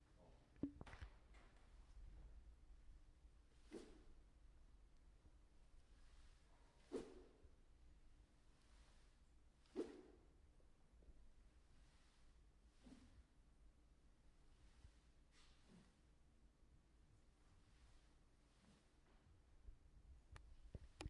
The sound of a wooden blade being swung at different speeds.
blade, swing